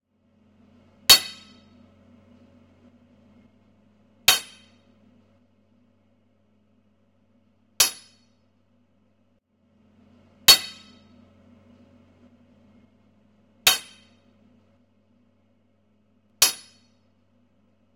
Hammering metal 1
Hammering metal component
Metallic, Metalworking